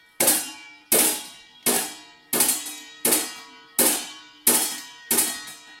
Steel Disk Bounce Multiple
Impact, Plastic, Bang, Steel, Tools, Crash, Tool, Hit, Boom, Friction, Smash, Metal